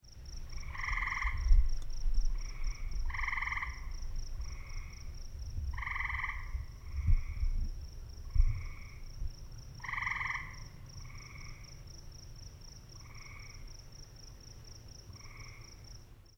Southern Ontario Woodlands
Ambiance,
Actually Mono
sennheiser 412
SD 552
field-recording, ontario, woodlands